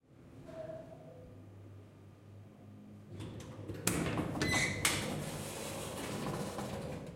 Ascenceur-Ouverture
An elevator door opening recorded on DAT (Tascam DAP-1) with a Rode NT4 by G de Courtivron.
door, recording, elevator, opening, field